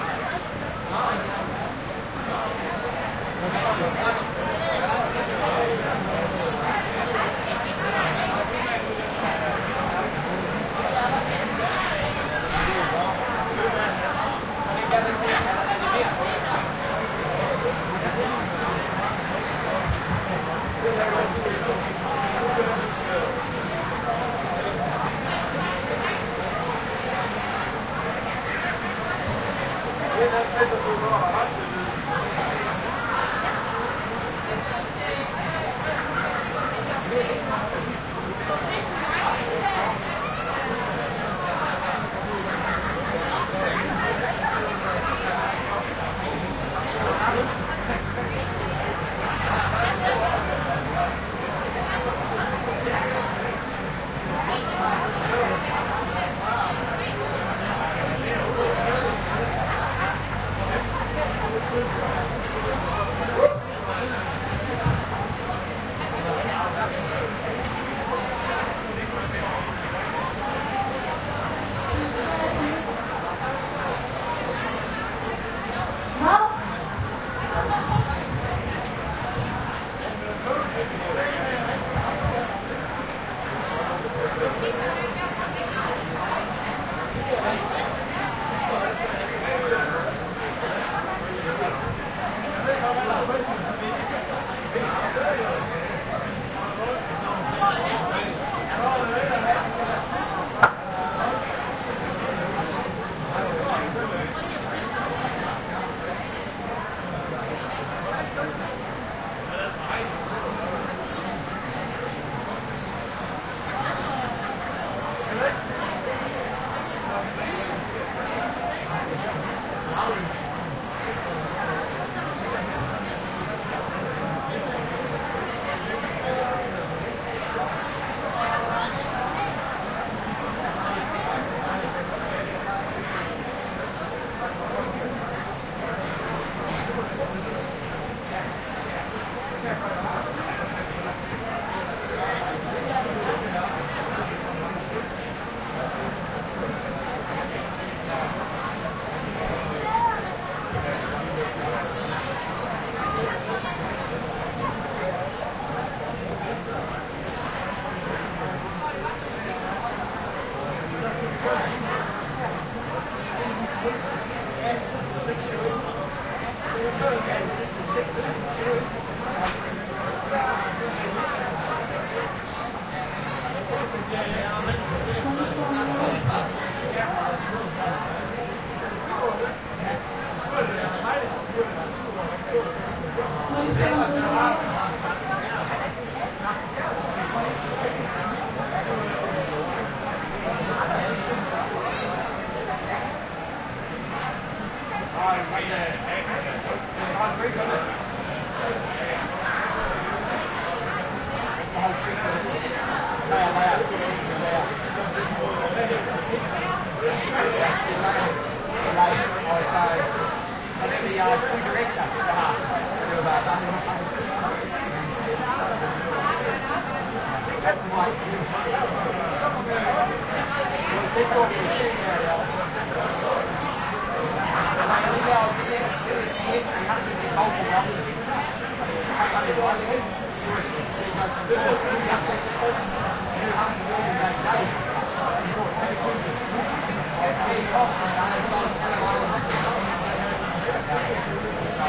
more chatter in tent
A shorter recording of a lot of people gathered in a tent. Recorded with a Sony HI-MD walkman MZ-NH1 minidisc recorder and two Shure WL183
adults, chat, chatter, crowd, field-recording, fieldrecording, kids, laugh, laughter, man, men, parents, people, rain, speaking, talk, talking, tent, voice, voices, woman, women